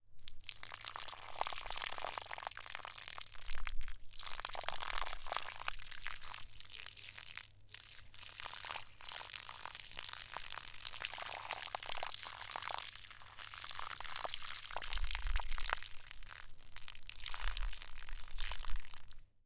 watering the soil
CZ, Czech, Pansk, Panska